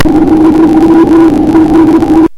Ambient Shit
bending, circuit-bent, coleco, core, experimental, glitch, just-plain-mental, murderbreak, rythmic-distortion